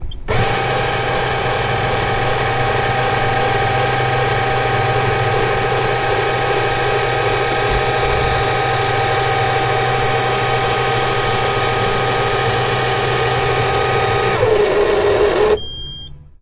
Recording of an air fed paper folder.
field-recording, folder, machine